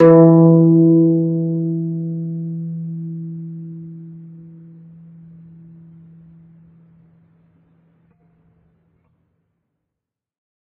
single string plucked medium-loud with finger, allowed to decay. this is string 10 of 23, pitch E3 (165 Hz).
kayagum, koto, acoustic, pluck, flickr, string, kayageum, zheng, guzheng, zither